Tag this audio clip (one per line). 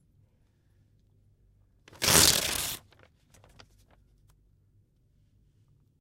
ProTools
AnaliseMusical
3Semestre
AKG414
JJGIBSON
AnhembiMorumbi
RTV
PaisagemSonora
EscutaEcologica
SonsdeUniversidade
CaptacaoEdicaoAudio